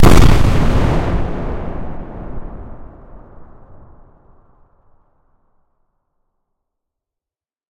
A totally synthetic explosion sound that could be the firing of a large gun instead of a bomb exploding. The reverberant tail is relatively long, as though the explosion occurs in a hilly area. But you can reshape the envelope to your liking, as well as adding whatever debris noise is appropriate for your application. Like the others in this series, this sound is totally synthetic, created within Cool Edit Pro (the ancestor of modern-day Adobe Audition).